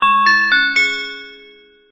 A simple jingle that can be used as an announcement sound for stations or airports, inside trains or busses. Made with MuseScore2.